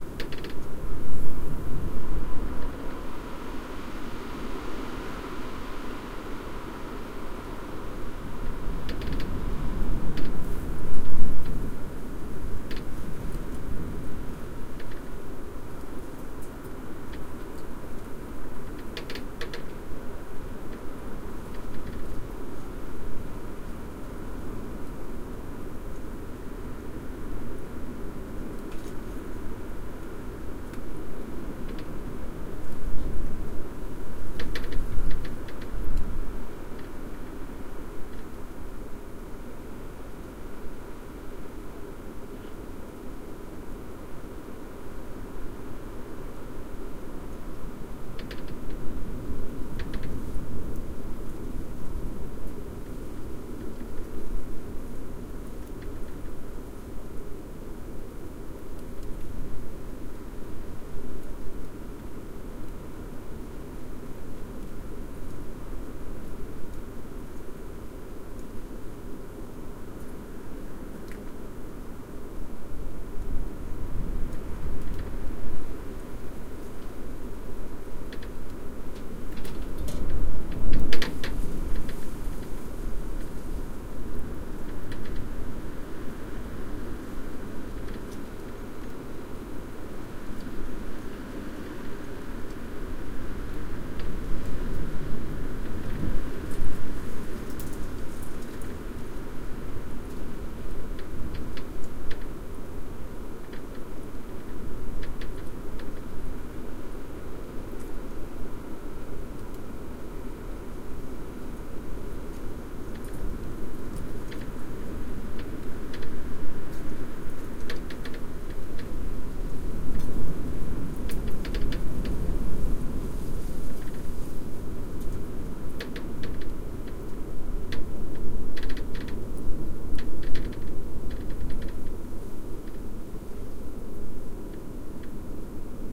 Cold Snowy wind
A cold, snowy wind sound recorded at night with something clacking slightly in the backgorund. Occasional wind gusts hit the microphones, making a nice low frequency sound too.
No processing has been applied other than slight amplification.
remote, winter